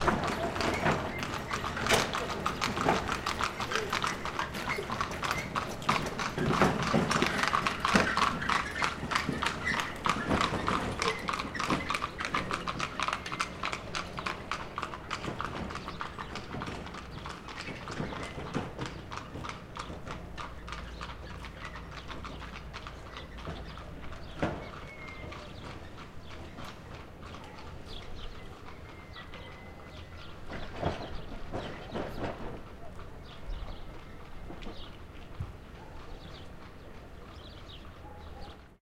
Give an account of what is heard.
Cart passing in the streets of Gheorghieni - Romania, 9 AM with dogs and birds.
Recorded with a Zoom H4.
birds, cart, dogs, horse, morning, romania